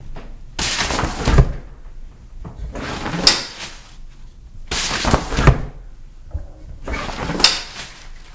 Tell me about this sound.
opening and closing an umbrella
close, open, rain, umbrella